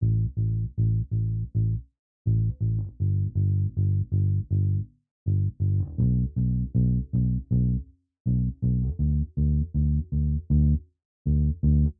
Dark loops 052 bass wet version 1 80 bpm
80, 80bpm, bass, bpm, dark, loop, loops, piano